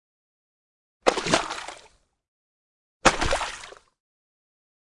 Kicking off big ice chunk into the water on flooded path it the woods. Recorded with Sony PCM M10 internal mics.